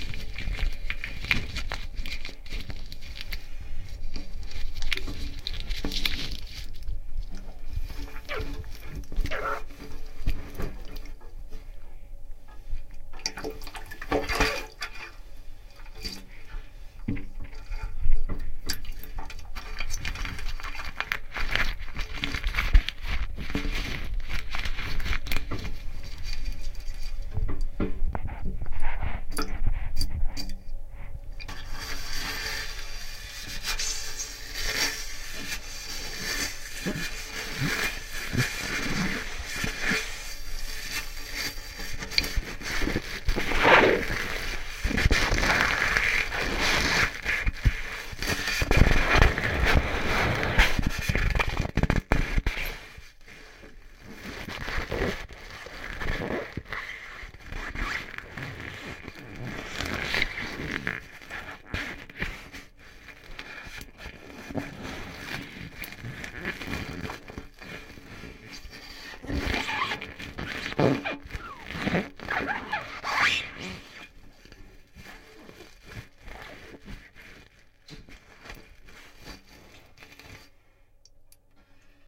crumpling paper underwater